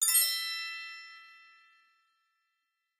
Synth glockenspiel bell item money gold coin pick up
bell, coin, glockenspiel, gold, item, money, pick, Synth, up